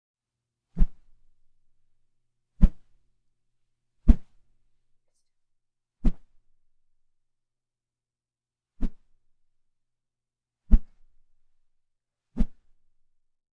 Quick small wooshes